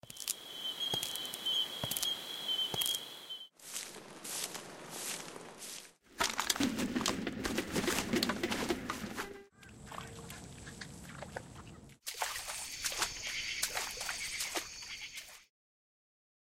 POYARD BERTRAND 2018 2019 Steps
STEPS
It’s a lot of type of walking. Behind I put different song atmosphere to create different universe in one song. The work isn't in the transformation of the different sound but more in the composition and transition.
Descriptif selon la typologie de Schaeffer
Masse : Cannelés
Timbre harmonique : Discret divers
Grain : rugueux
Allure :
Dynamique : douce et graduelle
Profil mélodique : scalair
Profil de masse : calibré
atmosphere, resist, steps, beach, ambience, forest